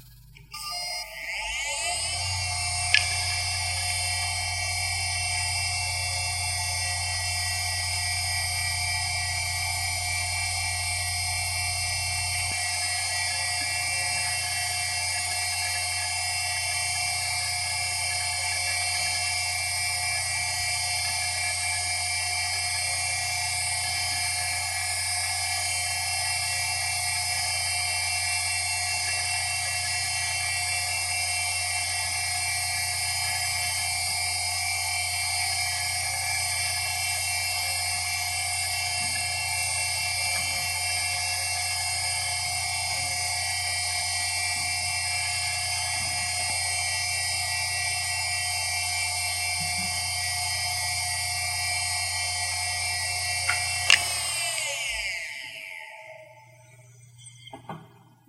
Seagate U6 - 5400rpm - Fast Spinup - BB
A Seagate hard drive manufactured in 2002 close up; spin up, writing, spin down.
This drive has 1 platter.
(ST340810A)
machine, motor, rattle